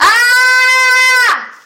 scream girl
Girl pain scream recorded in the context of the Free Sound conference at UPF
666moviescreams, crit, female, girl, grito, human, pain, scream, screaming, voice